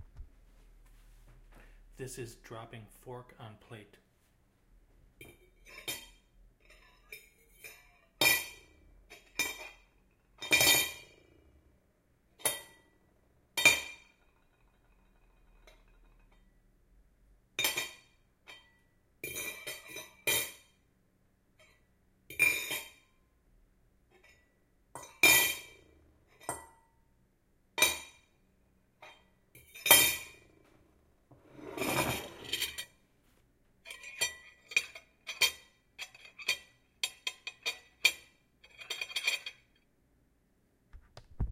What It Is:
Dropping fork on a plate.
Dropping a utensil on a plate.